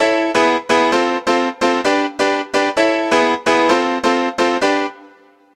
130-house-piano
House piano loop.. 130 bpm